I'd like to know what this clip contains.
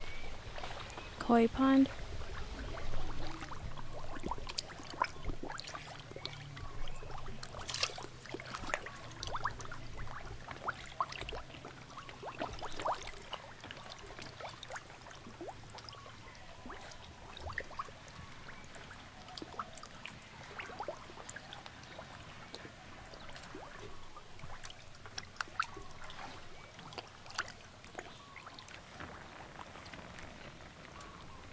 Fish Splashing Pond
Recording of Koi fish plashing around in a pond at a Temple
fish, plop, pond, splash, water